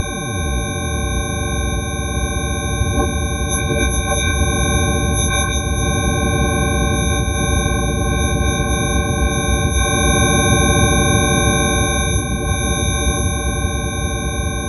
Bitmaps & Wavs Buzz 1
Bitmaps, buzz, computer, hum, machine, noise, sci-fi, Wavs